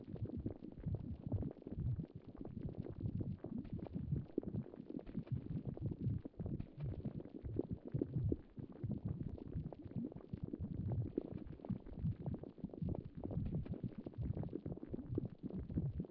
Hot spring.Seething and bubbles(2lrs,mltprcssng)
Artificial texture of bubbles and boiling sound. Can be used for different purposes. By lowering and raising the pitch, you can resize the source. It was originally created as part of this sound:
Enjoy it. If it does not bother you, share links to your work where this sound was used.
boiler, general-noise, sound, background-sound, hot, water, wet, ambient, spring, lava, cinematic, boiling, environment, ambience, background, field-recording, sound-design, atmosphere, bubbles